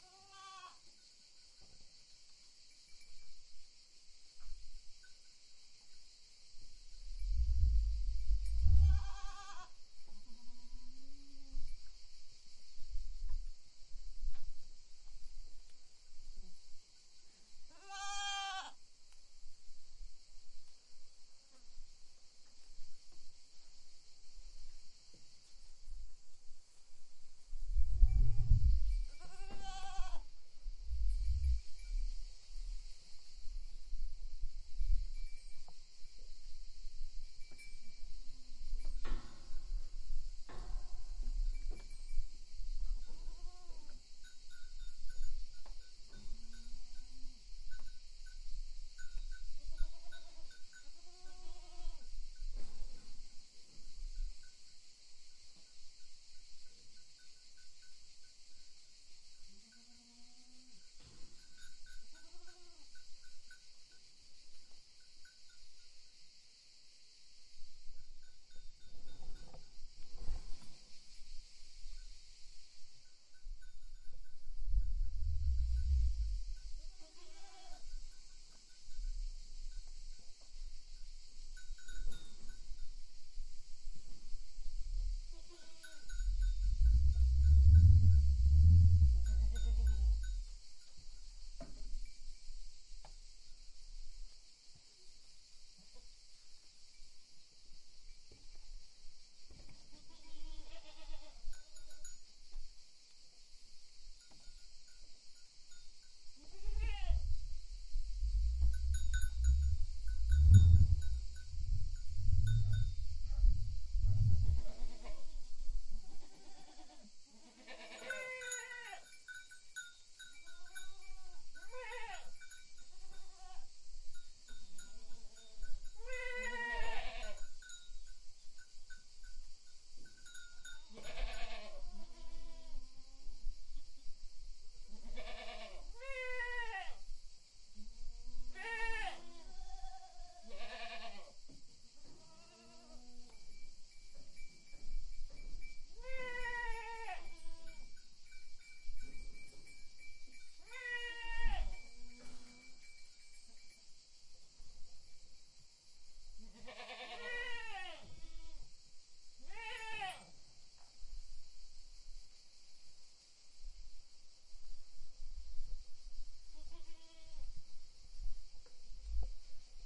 A little herd of goats in their enclosure.